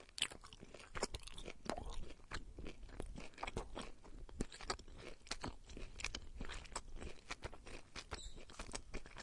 This sound is "grosser" than a porn scene. Too much fluid. Actually this sound really shows off the noise in the small diaphragm condenser compared to the large. You can easily hear the noise on one of the channels over powers the other. Again, this for the experiment of hearing the noise in mics and preamps.